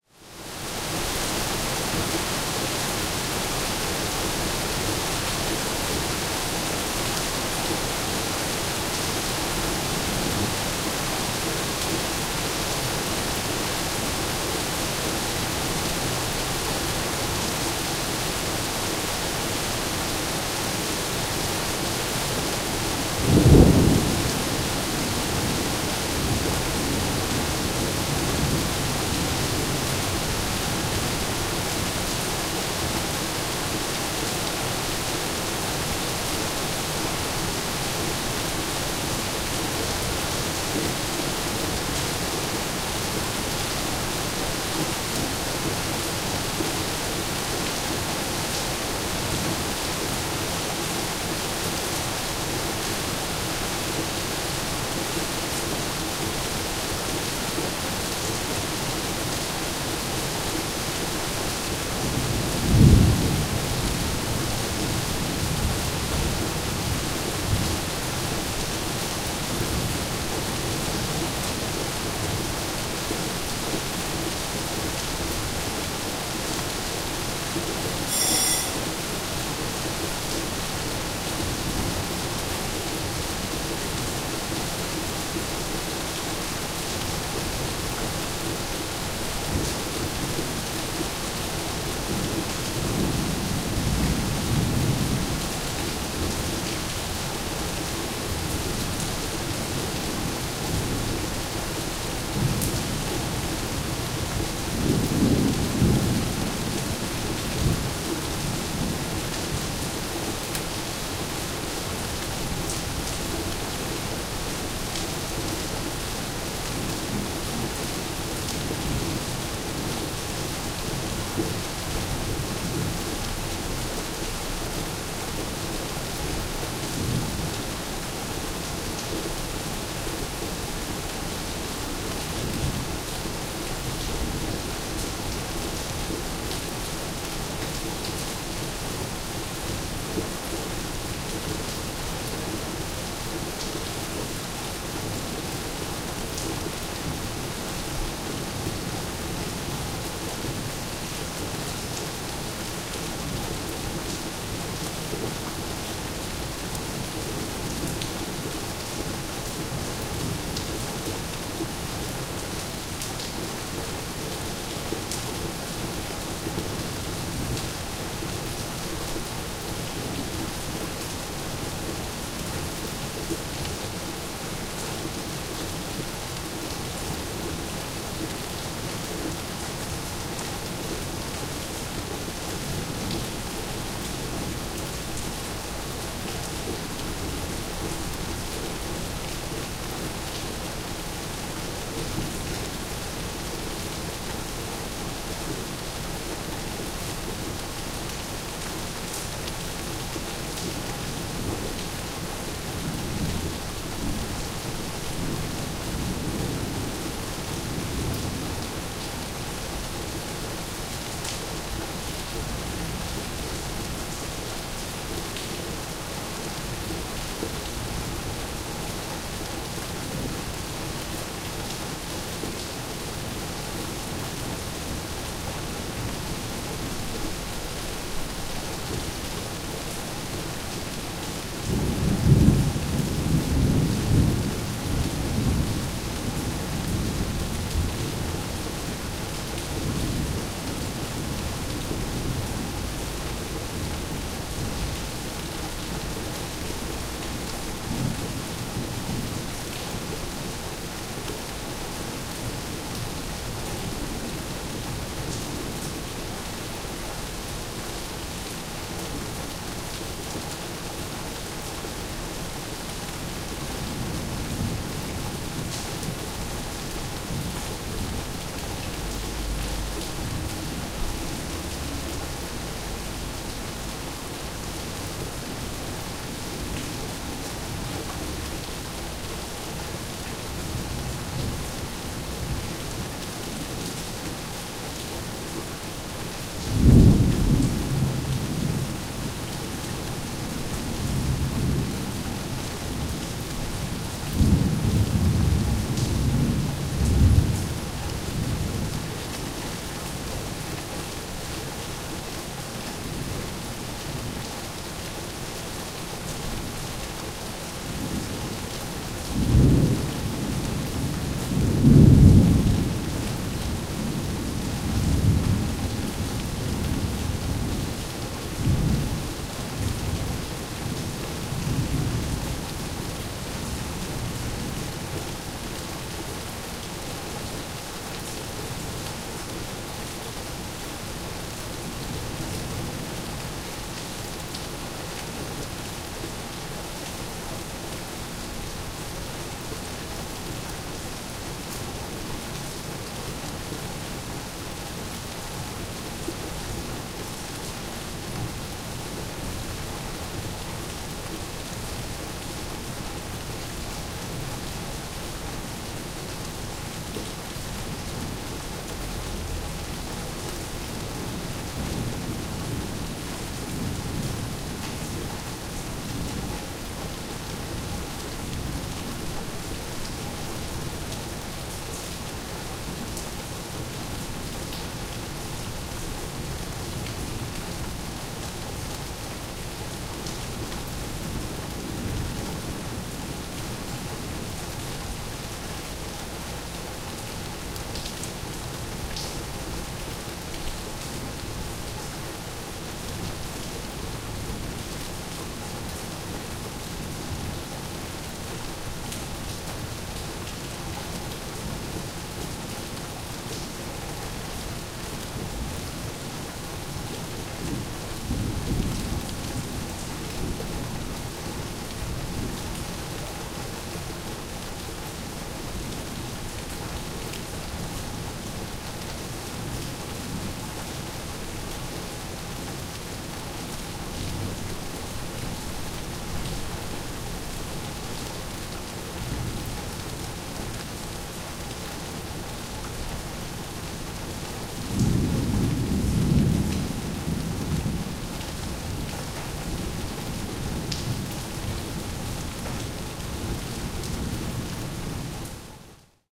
Korea Seoul Rain Rooftop
alarm, alert, bell, city, field-recording, korea, korean, rain, raining, ringing, rural, seoul, south-korea, southkorea, thunder, thunderstorm